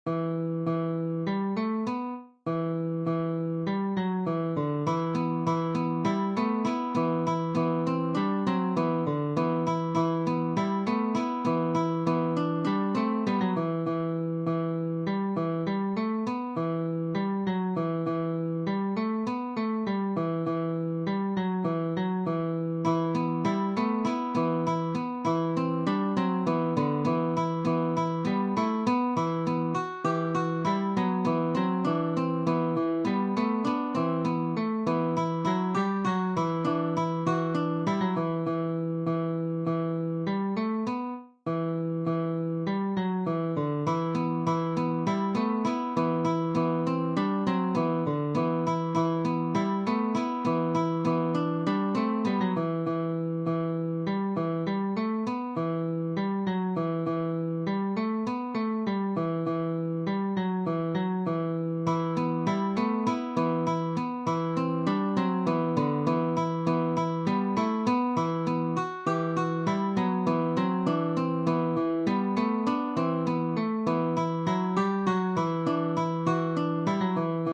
A short song I made up for a game I am making. It's generally meant to sound medieval. I did not record this as exported from an app.
I know it's not exceptional, but add some other instruments eg and it should sound great.
I didn’t end up using it, instead, I used Teller of the Tales by Kevin MacLeod
Simulator
ancient, march, medieval, music